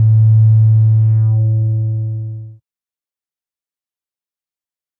Bass Sin Swing Umbrella end - one shot
Made with FruityLoops. Low frequency, not suitable for cheap speakers.